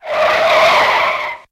car screech
Sampled from my beloved Yamaha RM1x groovebox (that later got stolen during a break-in).
sample, tires